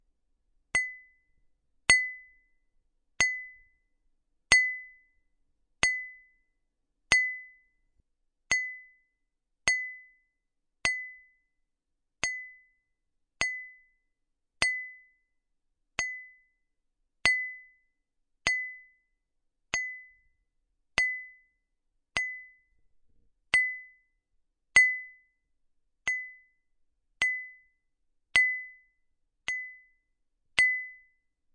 Hitting bottom of a thin glass